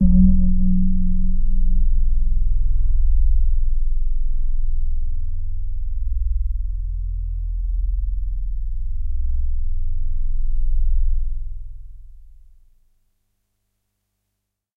This is a sample from my Q Rack hardware synth. It is part of the "Q multi 011: PadBell" sample pack. The sound is on the key in the name of the file. A soft pad with an initial bell sound to start with.
waldorf, multi-sample, synth, bell, pad, bellpad, electronic